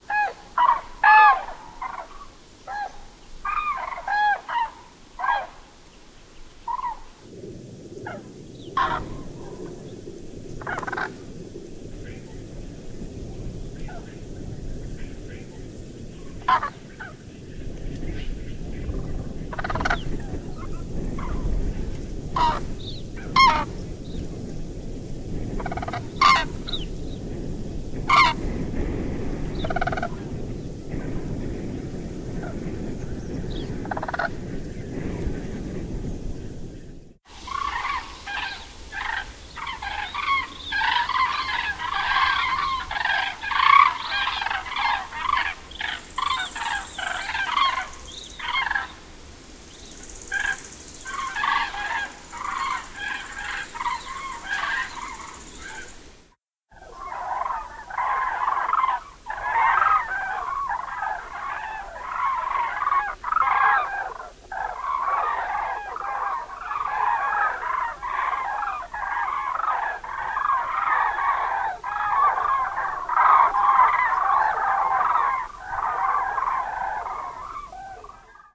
A flock of Gray Cranes (Common Crane) on the fly.
Russia, Taldom, September 2014.
DPA 4060, Telinga Parabolic Reflector, Sony PCM D100 + Sound Devices Mix-PreD
Gently denoising and compression applied.
Autumn, Birds, Crane, Field, Russia